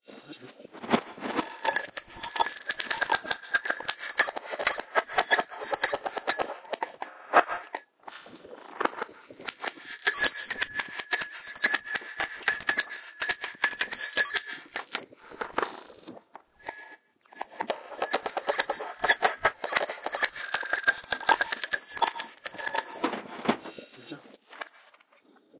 Strange Loop 1
Strange percussion loop
glitch, h5, zoom